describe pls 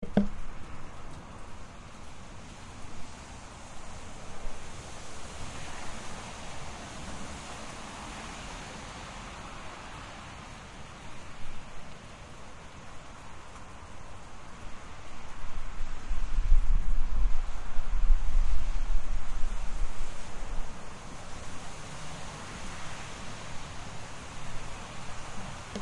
Cars on Rainy Road 8-11
The sounds of cars passing on a wet, rainy road